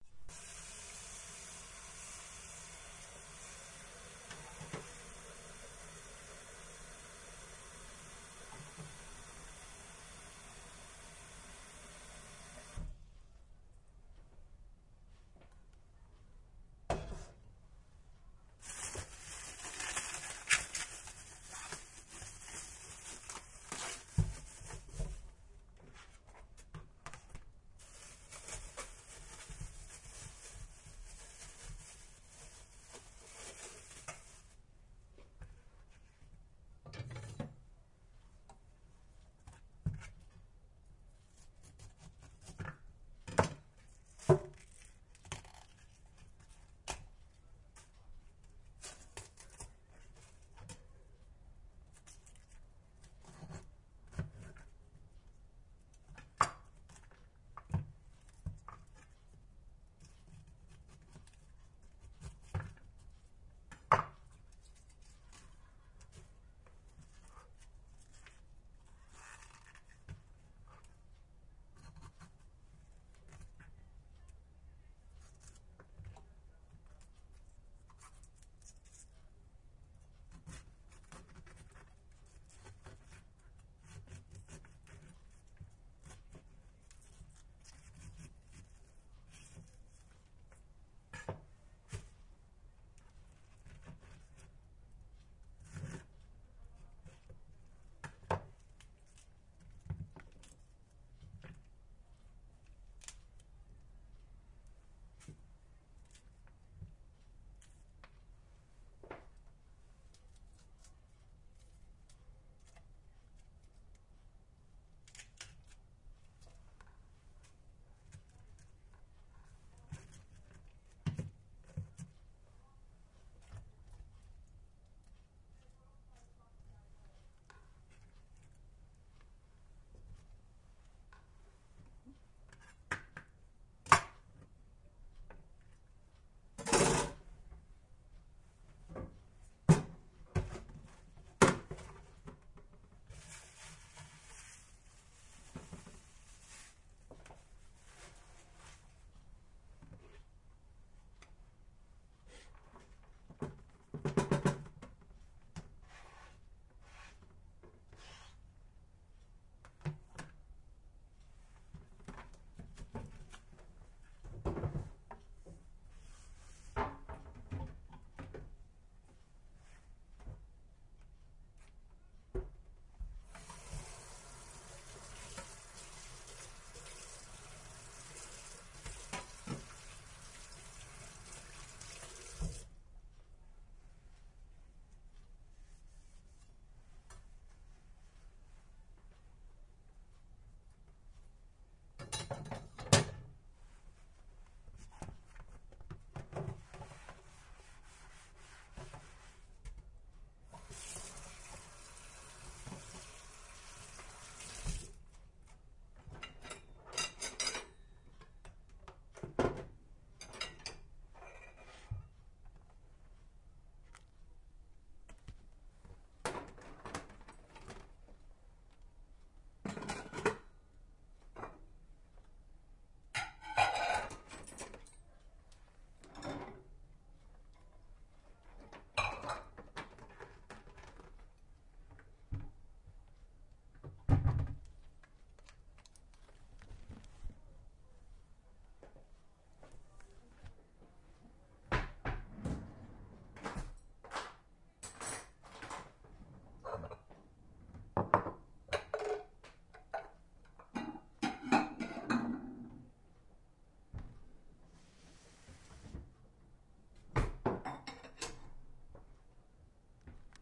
Chopping vegetables for dinner.
chopping-vegetables, field-recording, my-Jyvaskyla, kitchen-sounds